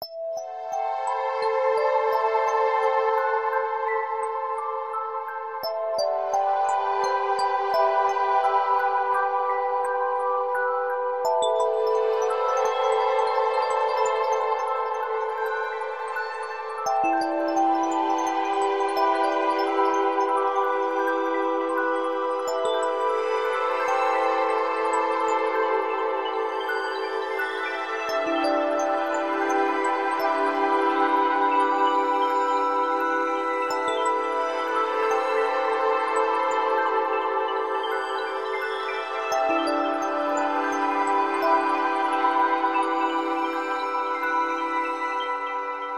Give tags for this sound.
Beauty Becoming Clarity Dream Everything Evolution Life Living Love Passion Resting Sacrifice Waking

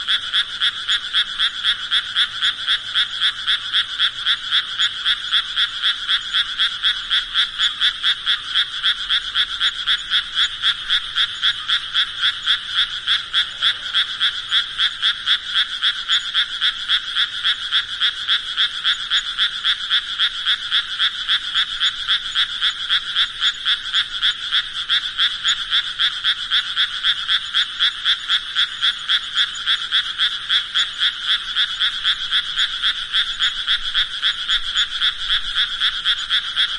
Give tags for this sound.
field-recording frog frogs japan Japanese lake nature rain reptiles Rice-field water

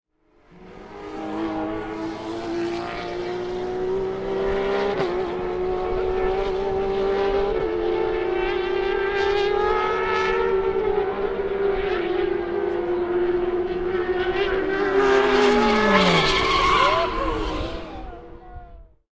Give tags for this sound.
car crowd engine field-recording race revving sound turismocarretera zoomh4

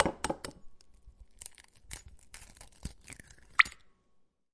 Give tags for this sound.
crack egg egg-shell hit shell

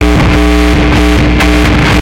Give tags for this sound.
loop 120bpm buzz ground

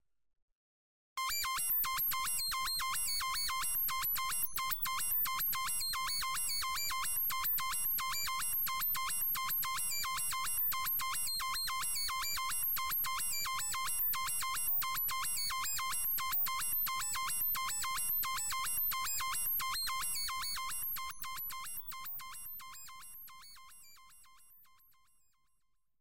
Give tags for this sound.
Space Electronic Noise Spacecraft Mechanical Sci-fi Futuristic Machines

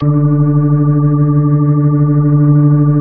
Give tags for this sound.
organ sample

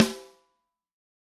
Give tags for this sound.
fuzzy drum multi 13x3 snare audix tama d6 sample velocity

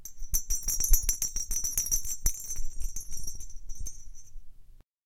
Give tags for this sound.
bell jingle percussion

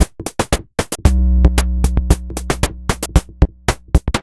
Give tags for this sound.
114-bpm drumloop electro bass

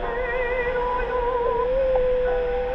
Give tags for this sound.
ambience atmosphere electronic radio

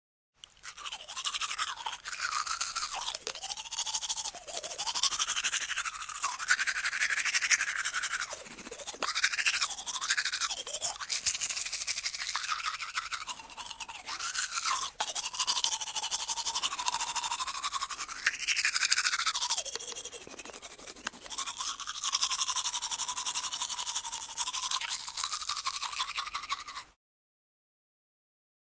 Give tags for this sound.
spazzolino bathroom denti clean teeth

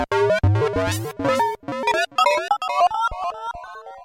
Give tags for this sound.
acid alesis ambient base bass beats chords electro glitch idm kat leftfield micron small synth thumb

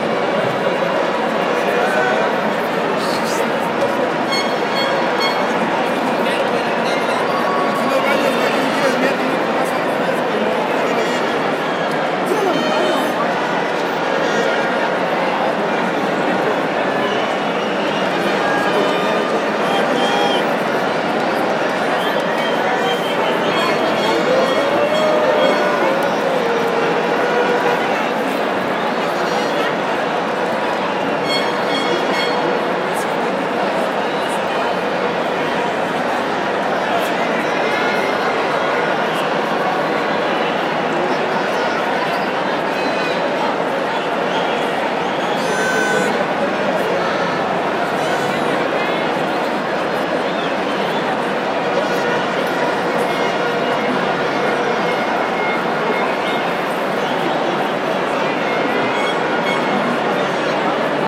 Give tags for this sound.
football game public stadium

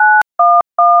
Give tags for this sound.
tone dialing telephone old Touch-Tone Dual-tone-multi-frequency DTMF 911 classic

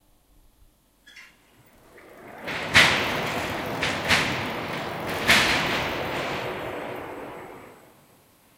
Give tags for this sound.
Buzz electric engine Factory high Industrial low machine Machinery Mechanical medium motor Rev

